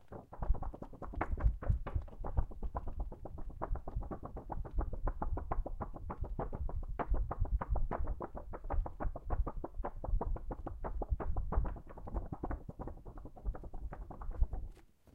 paper stir2
movement, stir, paper, foley